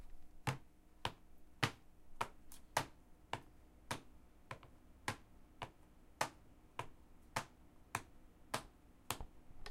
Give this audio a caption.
Stepping on wood
Hard stepping on a wooden desk